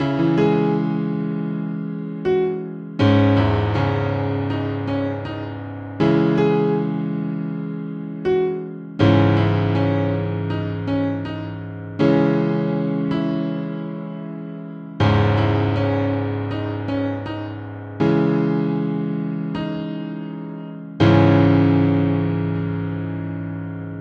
Grabado a través de un DAW y tocado en un piano, usada para un tema de hip hop, también se grabó una copia igual usando un microfono para usarla en el proyecto final.
Hiphop, Piano, Rap